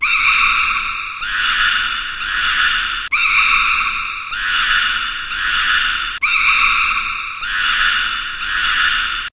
monkey-scream-long
long, yell